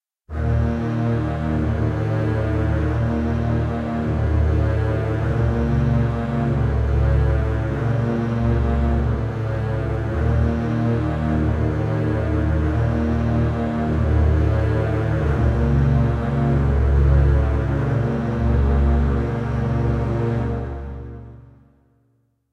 bass and cello4
made with vst instruments
sci-fi, cinematic, music, ambience, dramatic, hollywood, suspense, spooky, thiller, pad, atmosphere, scary, movie, space, background-sound, background, drone, trailer, soundscape, horror, dark, deep, film, ambient, mood, drama, thrill